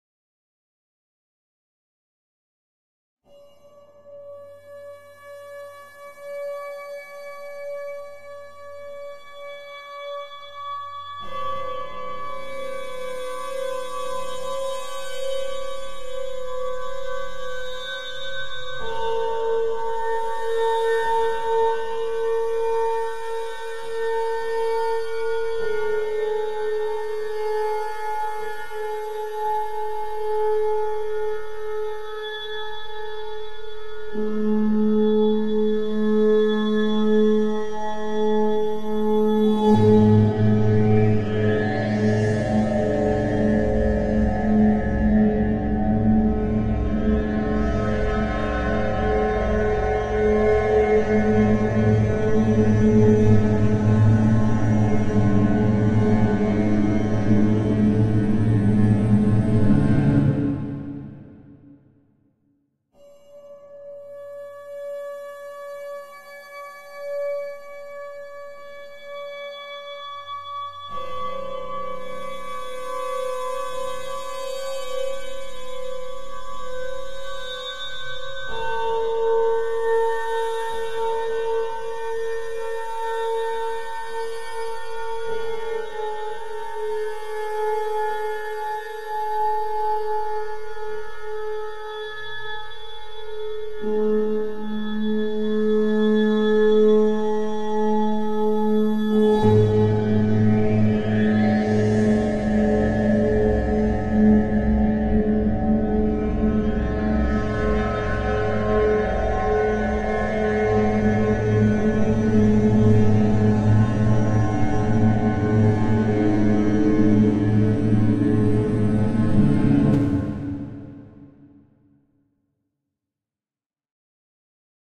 Oh Noise1
A repeated phrase: a granular texture generated in Kontakt, recorded live to disk in Logic and edited in BIAS Peak.
electronic, granular, processed, soundscape, synthesized